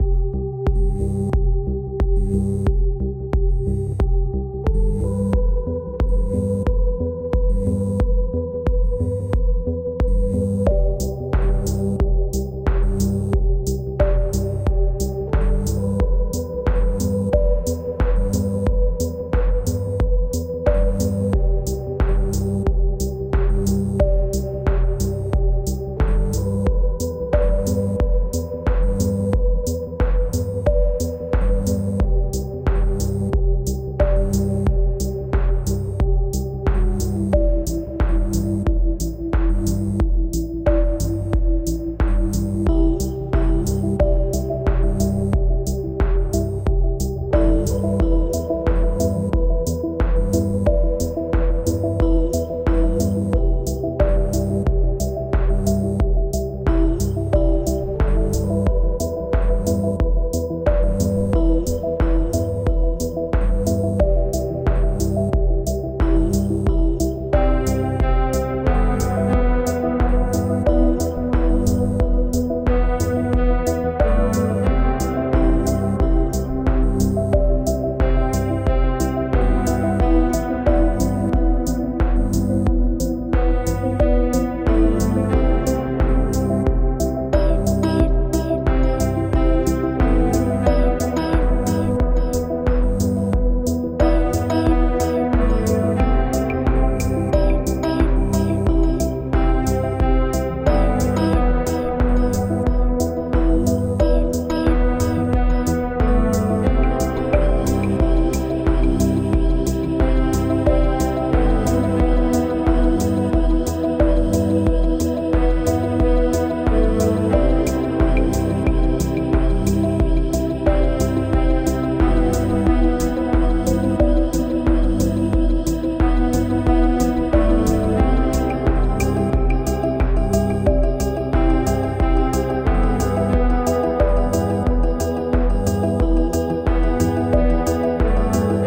Eleonor's will. - Electronic track music.